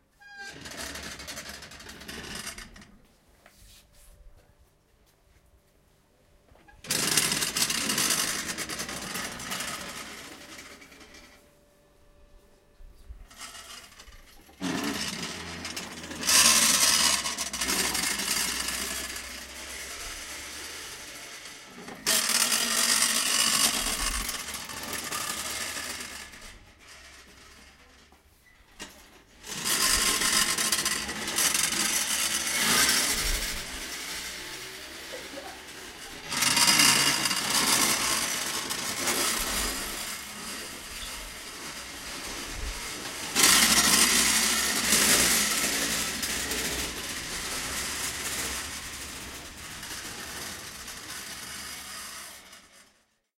Rotating bookracks Hasedera Temple
Sound from rotating bookracks at the Hasedera Temple in Kamakura, Japan. Recorded on March 20, 2017 with a Zoom H1 Handy Recorder.
delay, mechanical, spinning